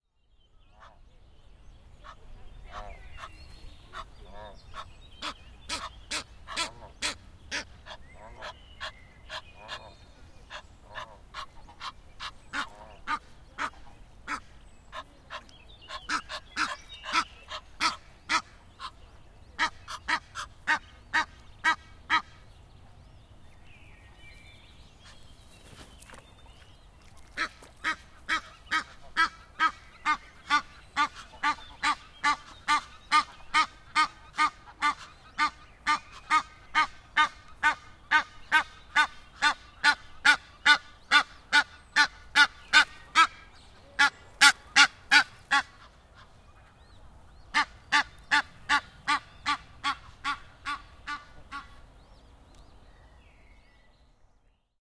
110505-001 egyptian goose
Gabbling of Egyptian geese [Alopochen aegyptiacus] on the embankment of a small lake in Neuss, Germany. Zoom H4n
alopochen-aegyptiacus, cackle, egyptian-goose, gabble, geese, goose